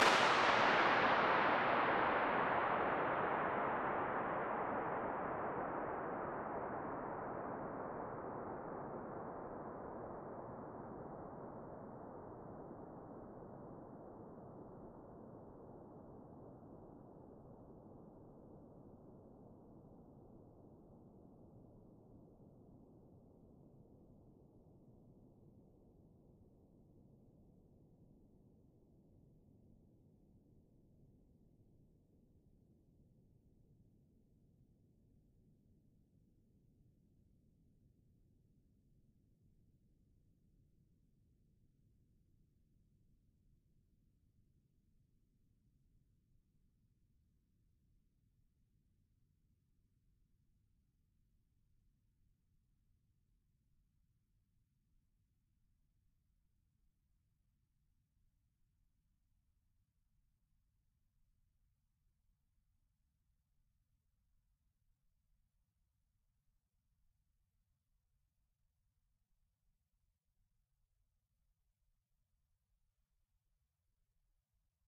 Measured for Sonic Wonderland/The Sound Book, this is an uncompressed impulse response from the space which holds the Guinness World Record for the 'longest echo'. It is a WWII oil storage tank in Scotland. Impulse response measured using 1/4" measurement microphone and a starting pistol.